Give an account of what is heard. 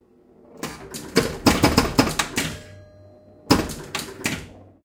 pinball-bumper hits
bumper hits on a 1977 Gottlieb Bronco Pinball machine. Recorded with two Neumann KM 184 in an XY stereo setup on a Zoom H2N using a Scarlett 18i20 preamp.
arcade, bar-athmosphere, bronco, bumper, flipper, Focusrite, game, gameroom, Gottlieb, Neumann-KM-184, pinball, plunger, Scarlett-18i20, Zoom-H2N